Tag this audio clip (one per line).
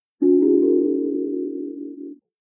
abstract
away
design
digital
disappearing
effect
fade
Fantasy
game
instrument
Magic
sfx
sound
sound-design
sounddesign
strange
Video-Game